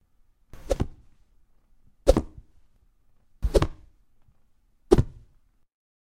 TELV 152 Punch woosh
Punch, fight, hit